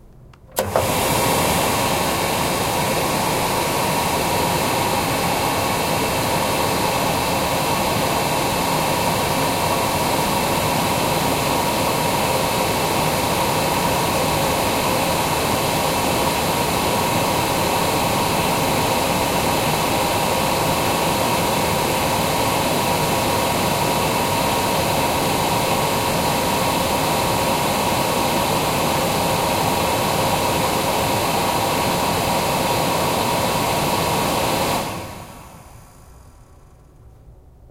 hand dryer
hand drying machine in a bathroom